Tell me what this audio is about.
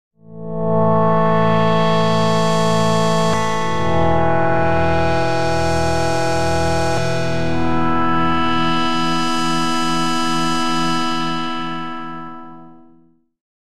Pad rich
A short rich pad sample made using organic in Linux Multimedia Studio
lmms, linux, pad, multimedia, studio, organic, rich